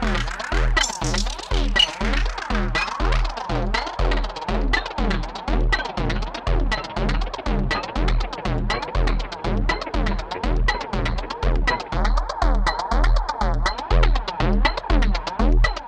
Zero Loop 1 - 120bpm
120bpm, Distorted, Loop, Percussion, Zero